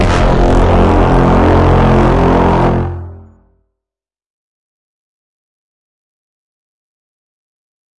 As requested by richgilliam, a mimicry of the cinematic sound/music used in the movie trailer for Inception (2010).